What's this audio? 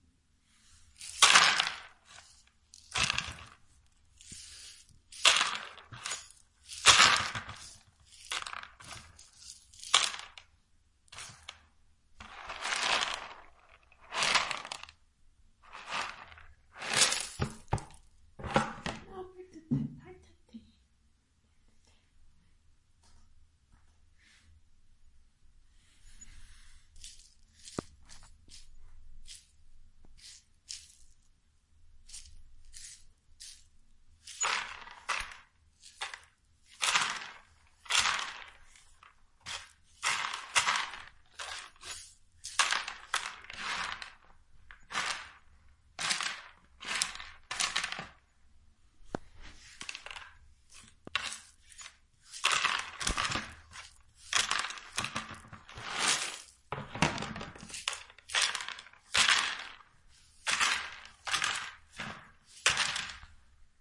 Sweeping beans scattered on the floor onto a plastic dustpan. You can hear the sweeping and then how the beans hit the plastic. It can work for other small objects being swept, like beads, seeds and such.
It also kind of sounds like small pieces of wood or plastic rolling around a plastic container.